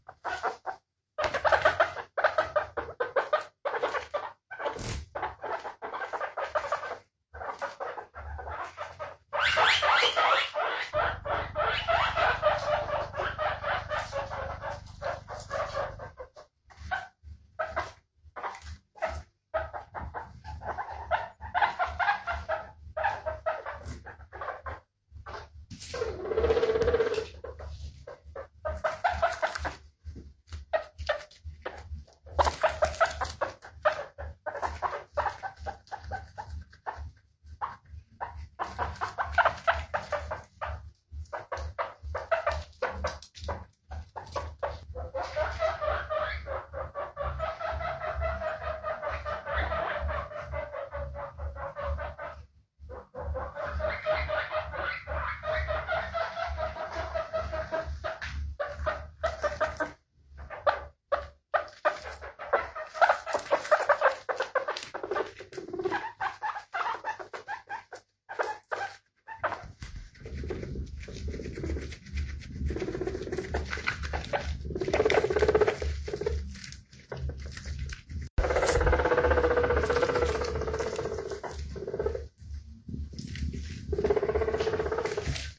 Guinea Pigs #2
Several guinea pig noises (squealing, purring, running around, breathing...) Recorded with mobile phone.
pigs, guinea, sniffing, sniff, purr, squeak, pig, noises, sound, squeal, noise